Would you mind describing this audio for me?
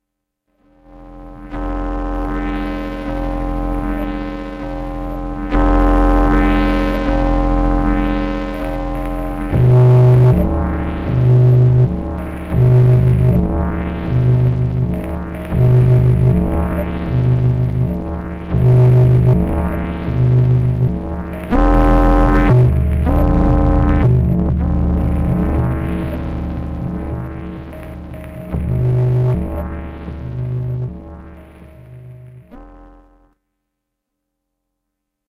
Scifi Microbrute 15
From the series of scifi drones from an Arturia Microbrute, Roland SP-404SX and sometimes a Casio SK-1.
drone, dronesoundtv, sci-fi, scifi, synthesizer, microbrute, arturia